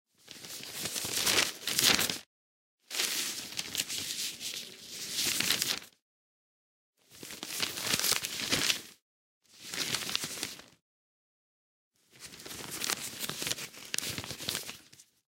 Handling a turning pages of a newspaper or journal.
Focusrite 2i2 3rd GEN
Sennheiser MKH50
news, read, magazine